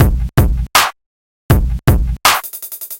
160 Fub dub drums 01
fubby drums for fubby synths